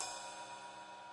08 Ride Thin-Long Cymbals & Snares
cymbals, Weckl, Rosewood, cymbal, 2A, turkish, Stagg, drumsticks, sticks, ride, Oak, drum, click, hi-hat, 7A, snare, Maple, 5A, crash, Brahner, drumset